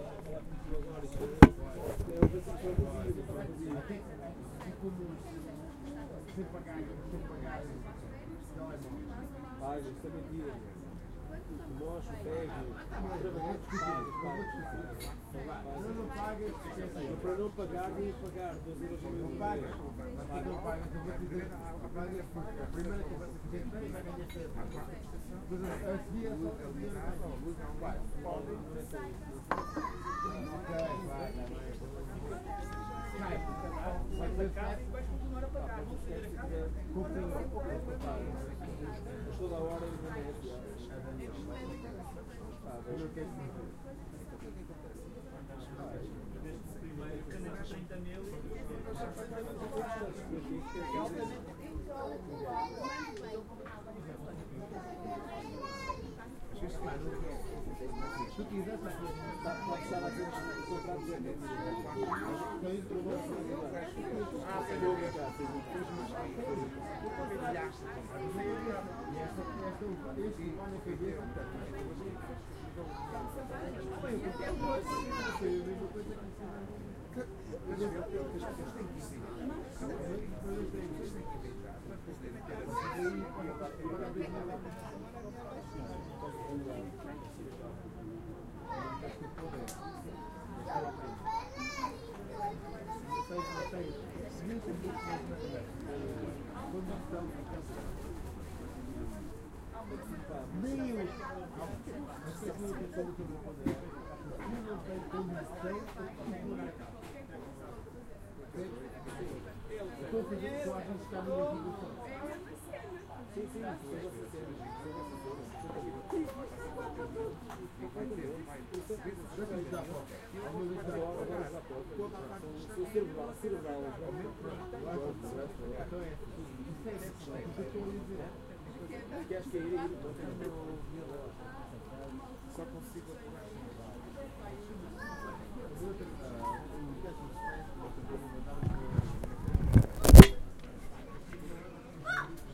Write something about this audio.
in cafe ( Lisbon)